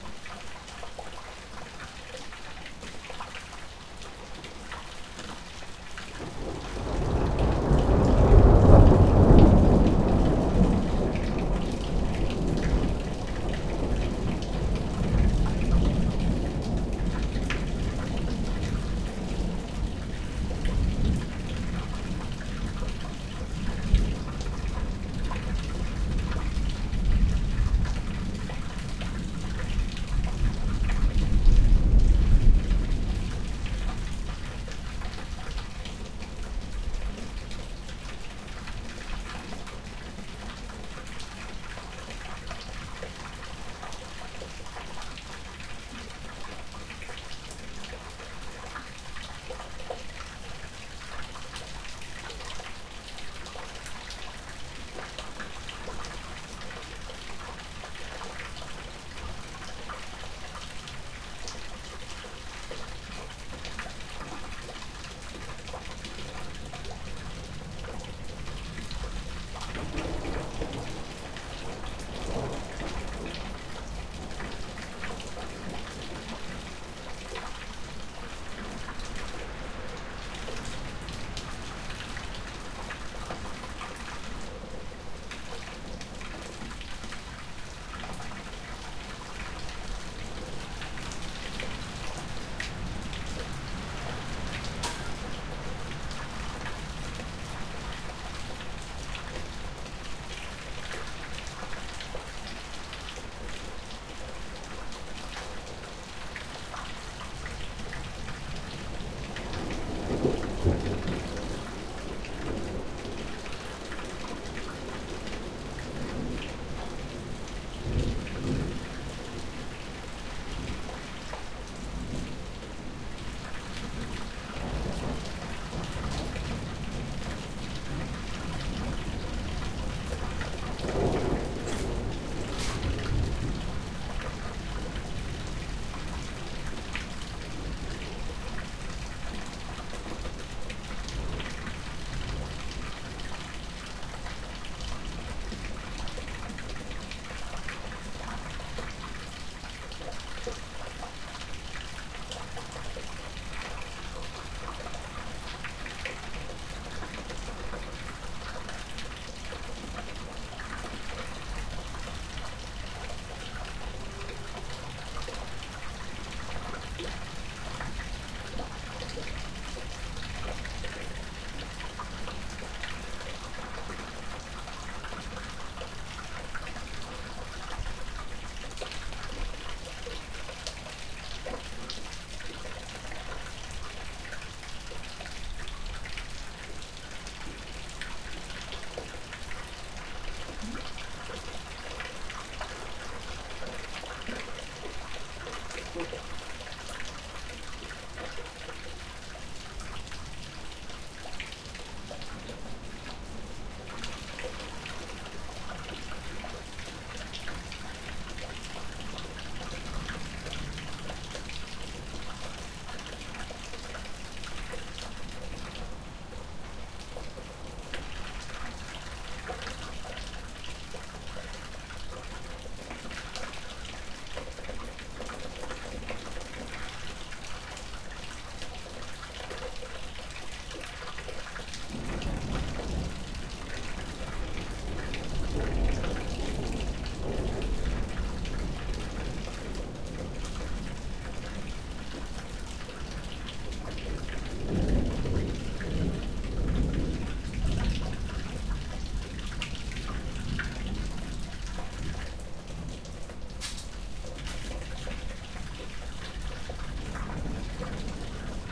Thunderstorm in Phoenix Arizona. The rain from the roof is being collected in a large plastic container, which is why its so loud. Recorded on an Edirol R4 using Rode NT4.